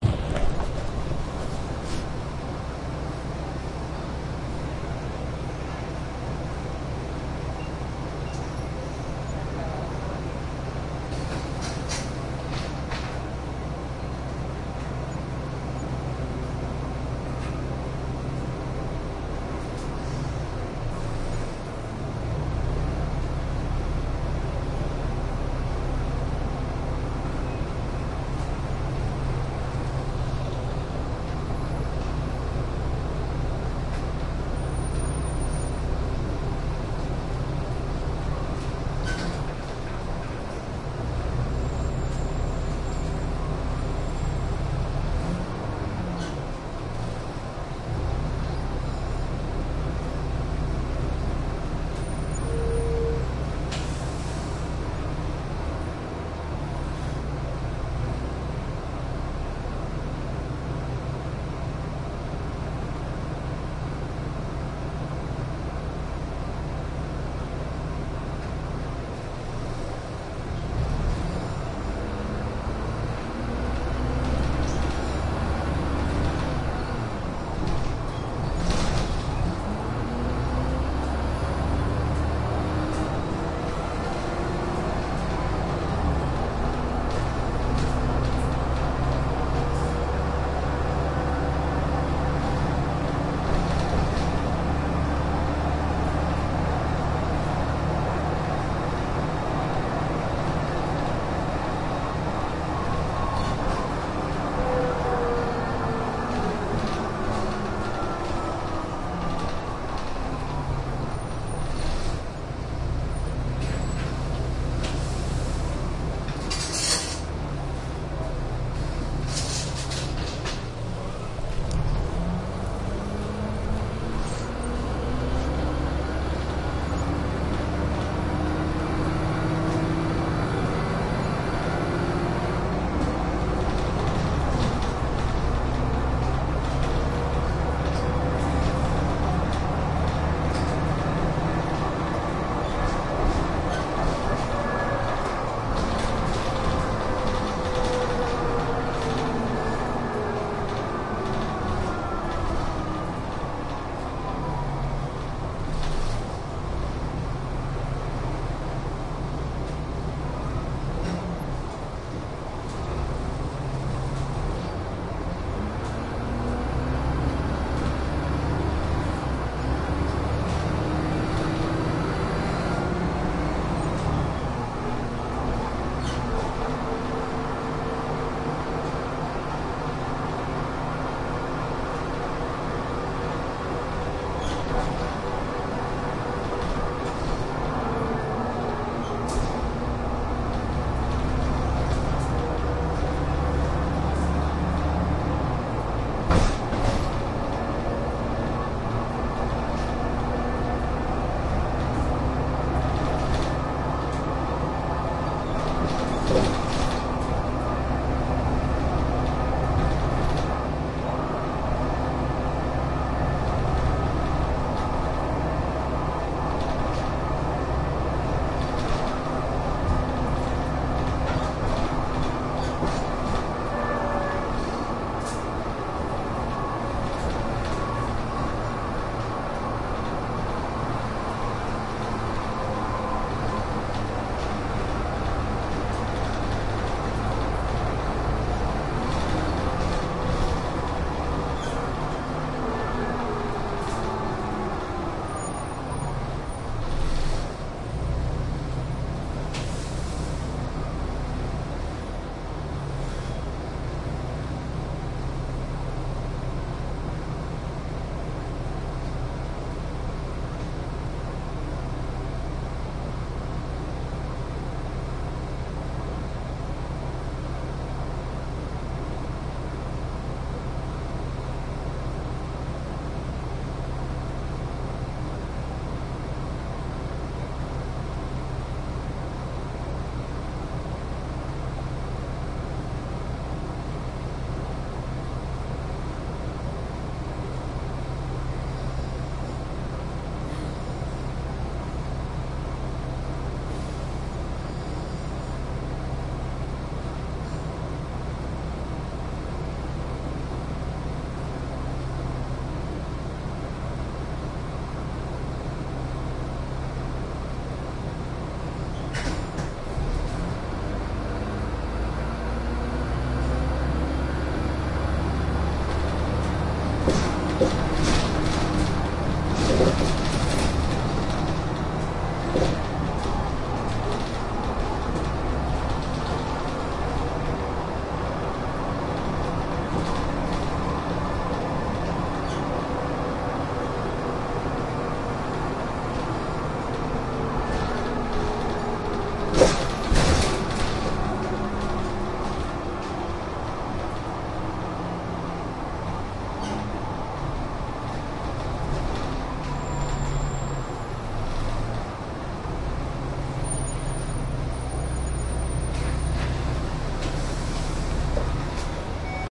NWFB-798-FoTanShanMeiStreet-ShaTinCentral-HZ6781-1142-20111126211616
I think this part of the 798 is the only clear(noise-less) part to record, others are having too many passengers and thus not able to record.
1142, 798, HZ6781